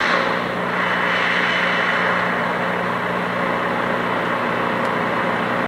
am static 2
Sweeping across a small portion of the LW band, the static and buzz fades in and out. Recorded from an old Sony FM/MW/LW/SW radio reciever into a 4th-gen iPod touch around Feb 2015.
am, amplitude-modualation, buzz, buzzing, frequency, interference, long-wave, lw, noise, radio, static, sweep, tune, tuning